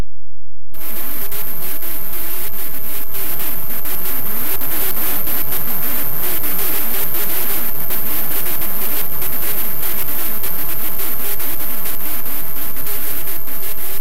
A small collection of audio clips produced on Knoppix Linux system.
I've been doodling with sounds for about 4-5 yrs. I'm no professional, just a semi-weird guy that likes to make weird sounds! LOL!